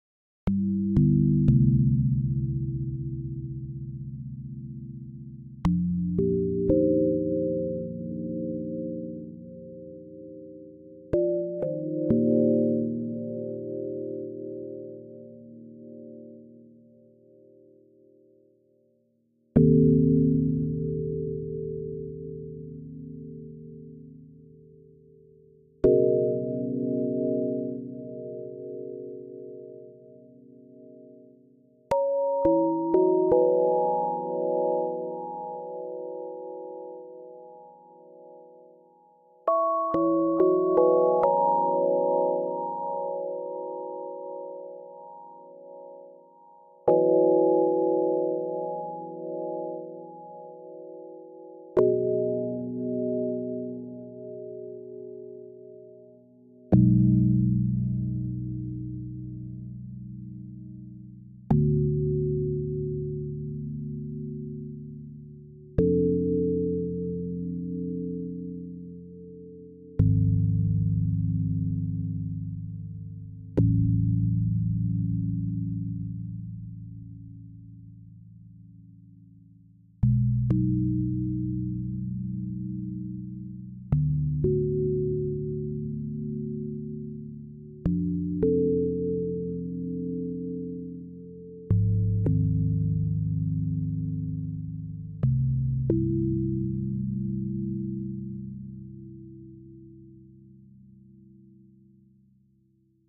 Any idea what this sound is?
Tones Retro Soothing Radiohead Bell
Soothing bells calming your mind. Kind of getting Radiohead Kid A vibes over here.
soothing, relaxation, analog, magic, asmr, vintage, retro, tone, relax, oldschool, bell